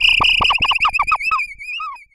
I made this sound in a freeware VSTI(called fauna), and applied a little reverb.
alien, animal, animals, creature, critter, space, synth, synthesized